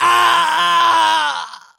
Scream Male 01
A male scream of panic and pain sound to be used in horror games. Useful for setting the evil mood, or for when human characters are dying.
epic, fantasy, fear, frightening, frightful, game, gamedev, gamedeveloping, games, gaming, indiedev, indiegamedev, male, rpg, scary, scream, sfx, terrifying, video-game, videogames